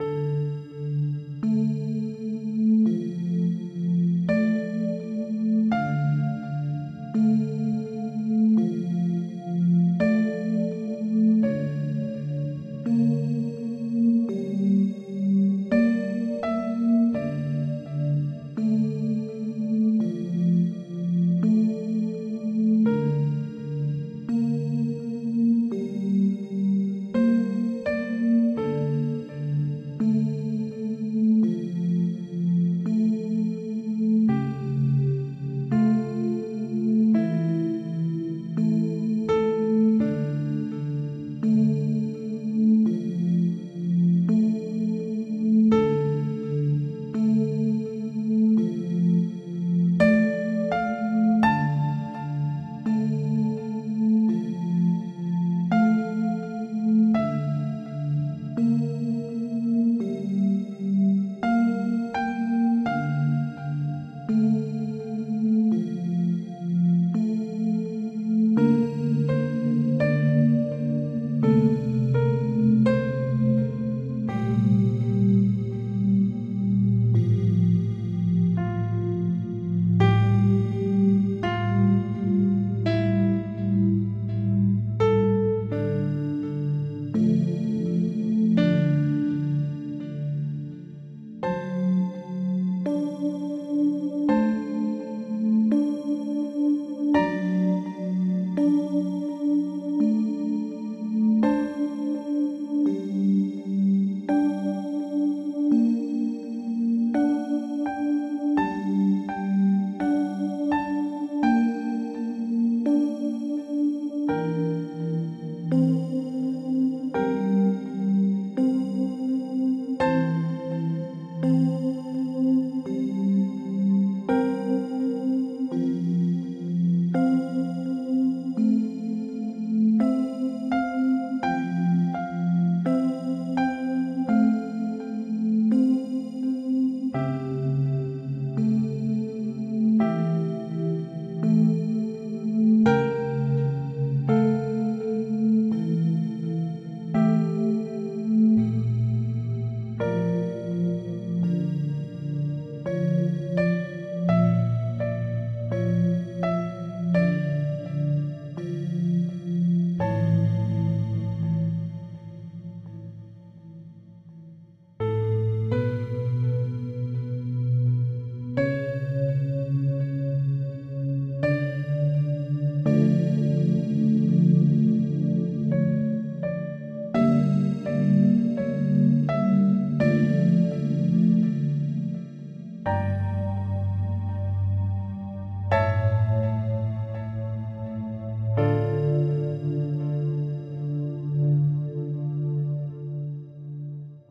hungarian dance slowed
Brahms's Hungarian dance Arranged to create gloomily atmosphere.
Brahms, dark, claasical, midi, Hungarian-dance, slow, gloomy